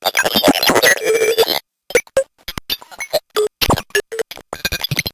incantor 4 (2 parts kinda)
This is a short sample of some random blatherings from my bent Ti Math & Spell. Typical phoneme randomness.
analog, bent, phoneme, random, spell